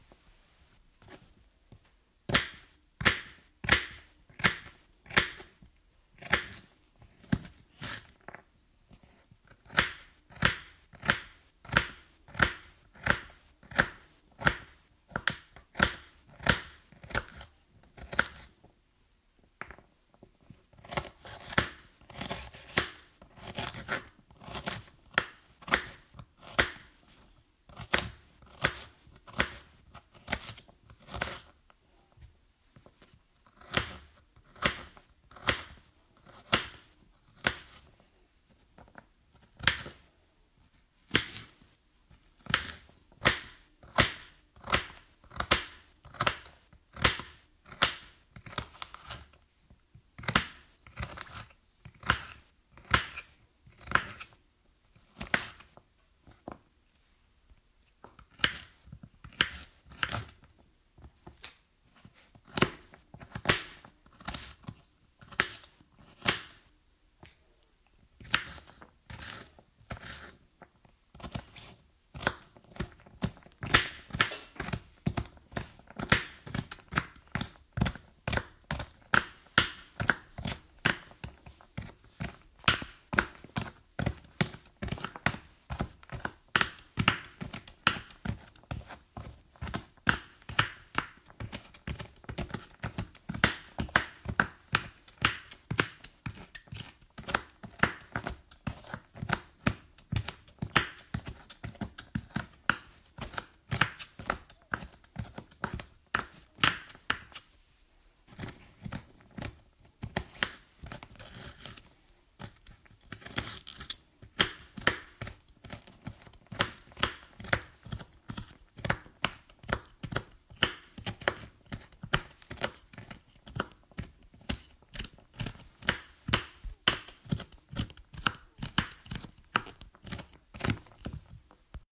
Cooking Prep
Cutting carrots to cook
carrots, chopping, cooking, cooking-prep, vegetables